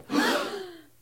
Small crowd quickly gasping
audience, crowd, gasp, theatre